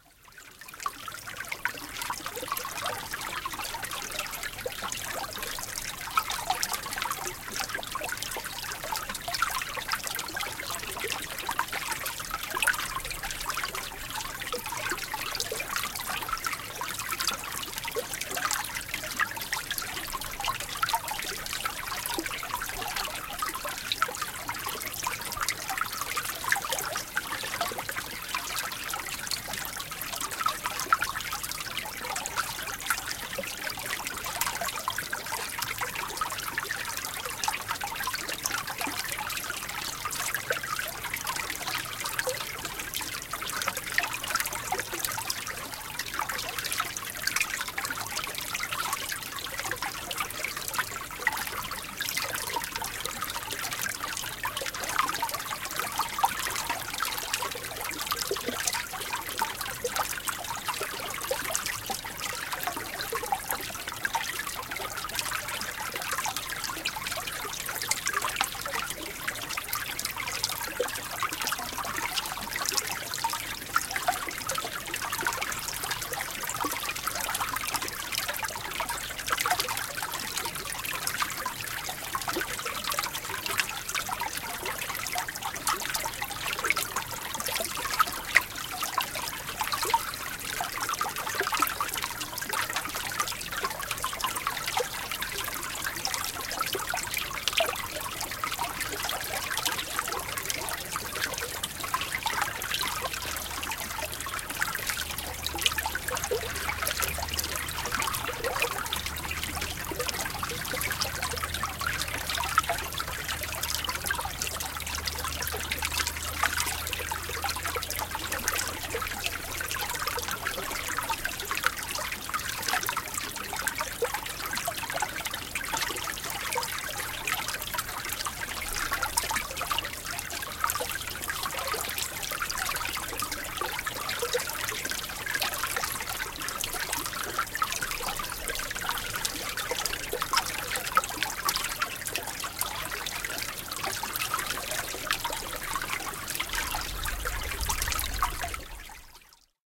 Pieni puro lirisee / Small brook gurgling and babbling brightly, partly under ice
Recorded in the autumn in northern Finland, also usable for spring.
Osittain jääkuoren alla oleva pieni puro. Vesi lirisee ja solisee heleästi. Lähiääni.
Äänitetty syksyllä, mutta sopii myös kevät -ääneksi.
Paikka/Place: Suomi / Finland / Inari
Aika/Date: 19.10.1976